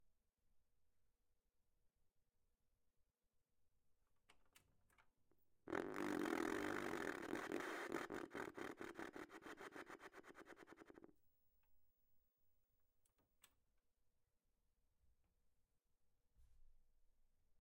Broken TV - Track 1
Some noises from my broken TV set.
tv-snow, noise, tv